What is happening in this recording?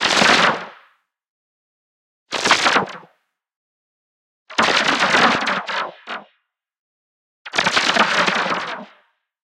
Faked volley of arrows released from a group of archers with long bows. The individual sound was modeled in Analog Box, replicated with variations, filtering, random triggering, and panning in yet another Analog Box circuit, and then two runs with periodic output were mixed together (in Cool Edit Pro), one shorter and one longer. Here are 2 shorter, denser firings, followed by 2 longer, more sporadic firings. Most of what you hear is the quick swoosh and the resulting hit on the wooden bow, with very little receding hiss from the fletching of the flying arrows. Remember, it's totally fake, so it may or may not be useful. This was in response to a request in a forum posting ( Volley of arrows sound needed ), which in a way is kind of a like a dare, though not formally, so I put it in my dares pack.
bow, archers, arrow, abox, volley, weapon, synthetic